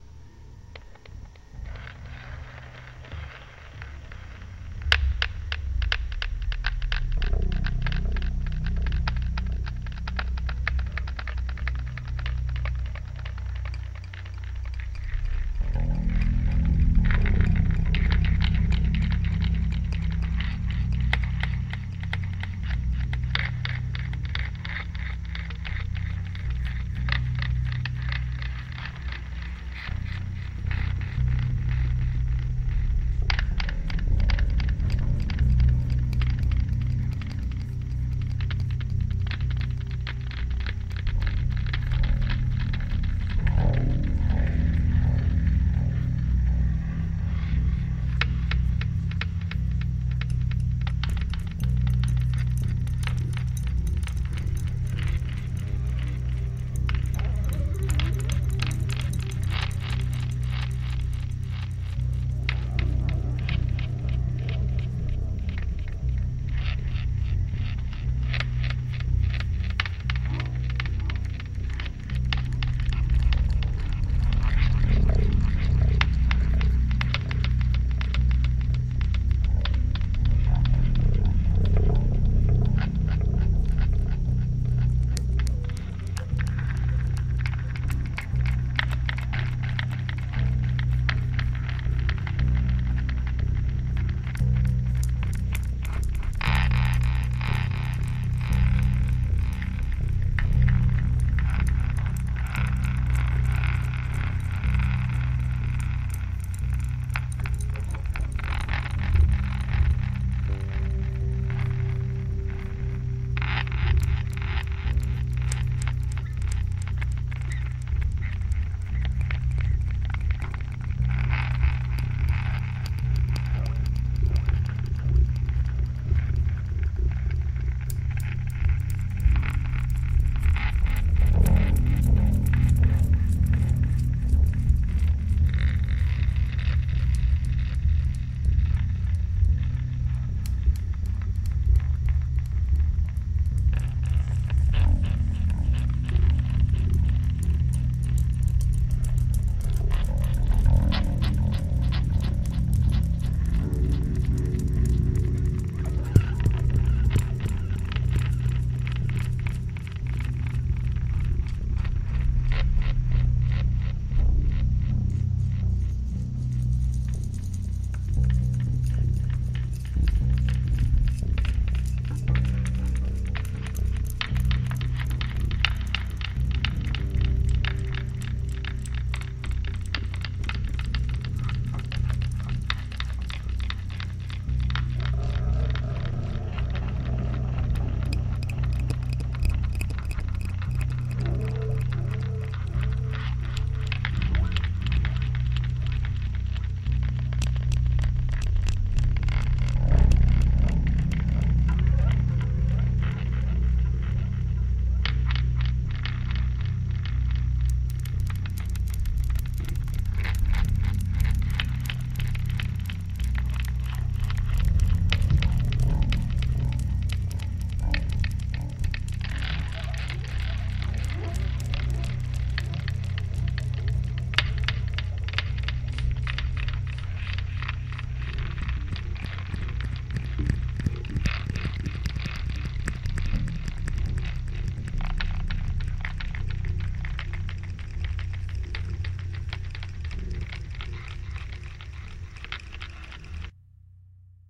Mind Harmonics
A music by Dead Tubes Foundation
Created with bass (Cort Action V)
Guitar recorded into PC line in.
Software reverb and delay in postproduction
Software used: Audacity (free)
Noises and other sounds recorded by Shure PG58 microphone and DIY mic preamp and effected by reverb and delay made in Audacity in postproduction
For noises I used my scissors, hits on PVC tubes and metall scratch.
ambietn, background-sound, creepy, dark, delusion, drama, dramatic, drone, fear, film, frightful, ghost, Gothic, haunted, horror, macabre, music, nightmare, phantom, scary, sinister, spooky, suspense, terrifying, terror, thrill, wave, weird